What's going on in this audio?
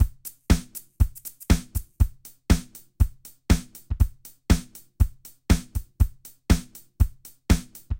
120 disco drum loop
120 bpm disco drum loop
120-bpm, disco, drum-loop